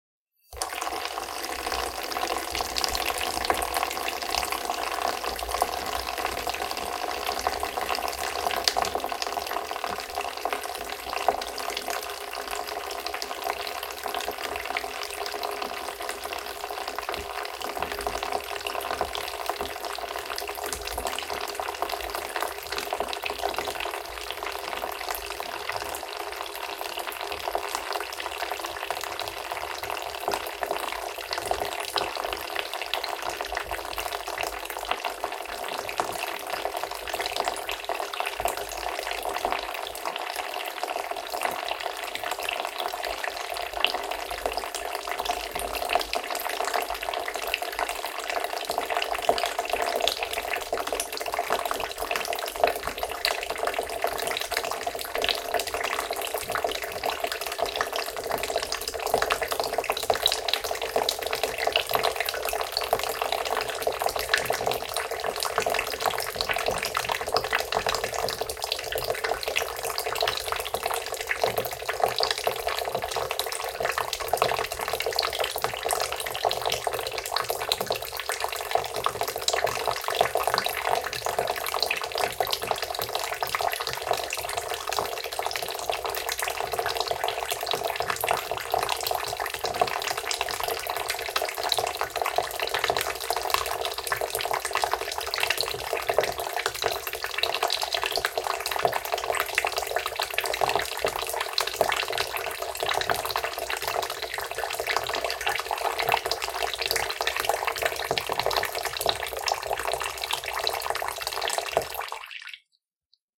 Water Drip - 3
splash, tap, wet, dripping, liquid, rain, raining, water, drip